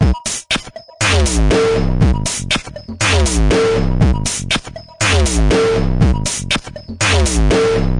120 bpm distorded drum loop
120 bpm hardcore-like drum loop with distorsion applied
120, 120-bpm, 120bpm, beat, bpm, distorded, drum, drum-loop, drums, eletronic, hardcore, industrial, loop, noise, noisy, rhythm